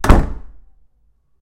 Door slam 2
A simple door slam recorded in mono with an NT5 to mini disc.
door, slam, wooden